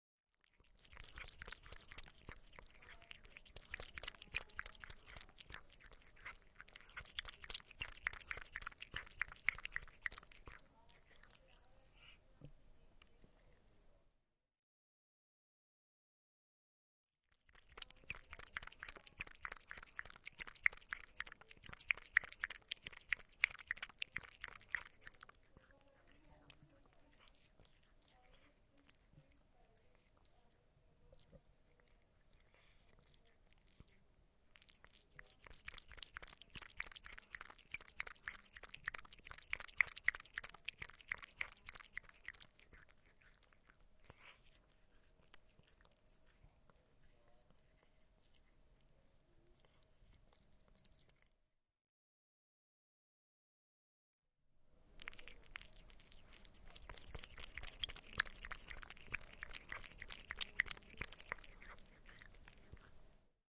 Cat is drinking milk